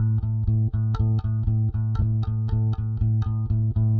Small set of bass loops - typical rock eights on 120 bpm - different notes (at the end of file name). Loops perfectly. Line bass signall with compressor. Fingered.